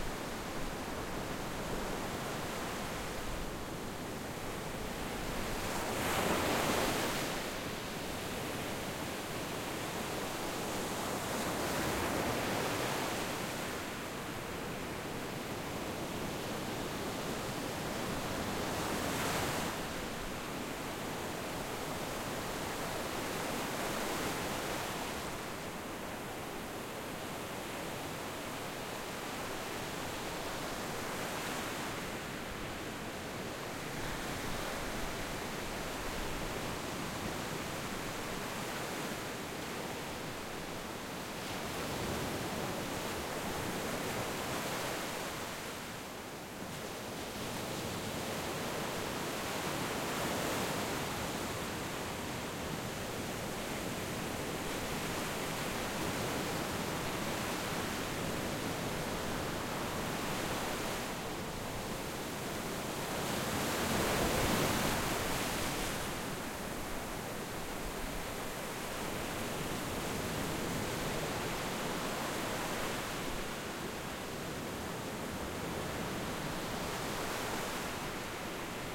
Recorded in Destin Florida
Close-up of waves rolling onto shore.